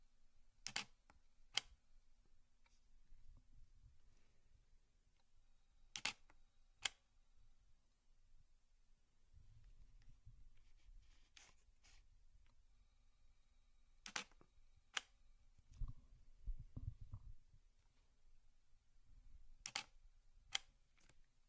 Canon 60D Shutter
The sound of a Canon 60D's shutter clicking as it takes a picture.